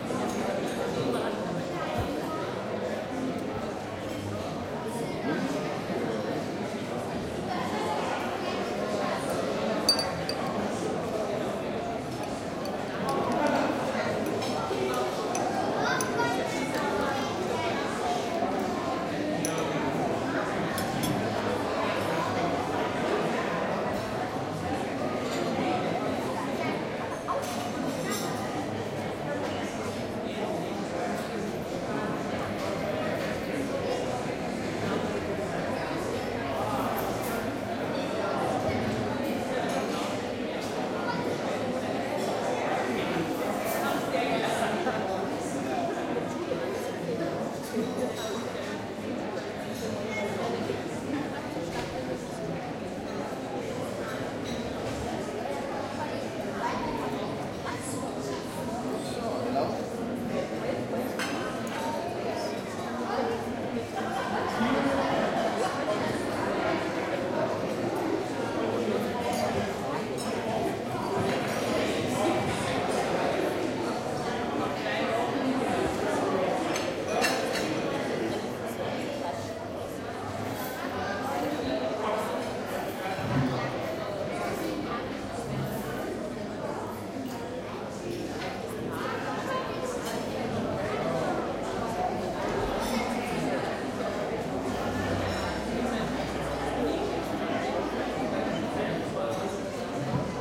140812 Vienna CafeZentral F
4ch surround recording of the interior of the Café Zentral in Vienna/Austria. It is breakfast time, and visitors of all nations are seated in this famous establishment for coffee, pastry etc, talking and clattering loudly, filling the warm and rich acoustic space with life.
Recording conducted with a Zoom H2.
These are the FRONT channels, mics set to 90° dispersion.